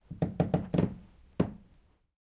A floorboard creaking recorded with an NT5 on to mini disc